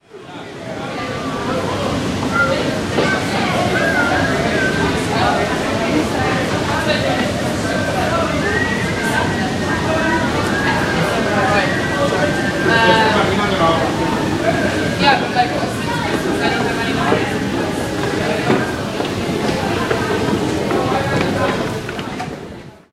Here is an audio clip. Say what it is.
London Underground- escalators at Baker Street
A very average sound of the escalators descending into the tube. Just the mechanical sound of the escalators and a few conversation. Recorded 19th Feb 2015 with 4th-gen iPod touch. Edited with Audacity.